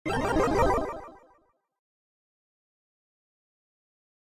I used FL Studio 11 to create this effect, I filter the sound with Gross Beat plugins.